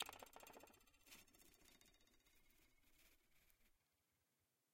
Queneau Douing 01
battement de regle sur le bord d'une table
vibrate, psychedelic, bizarre, douing, metal